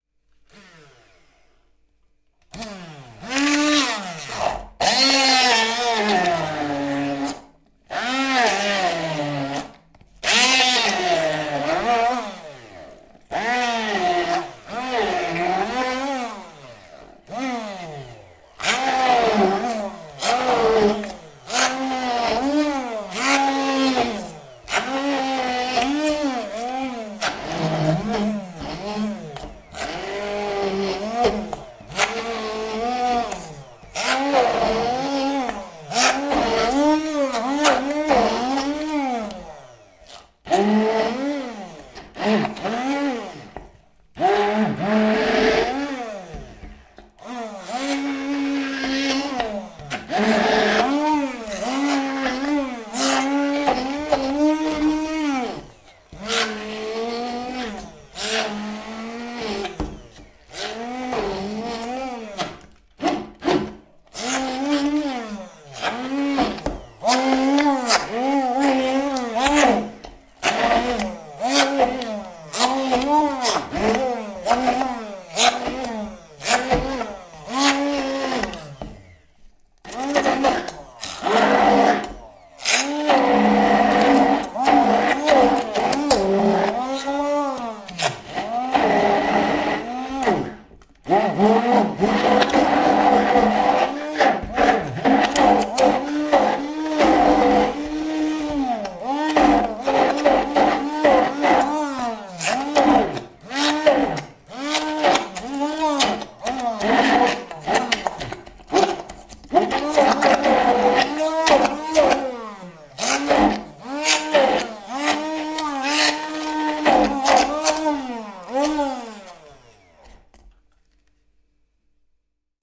Sound of an Hand Blender making "Houmus"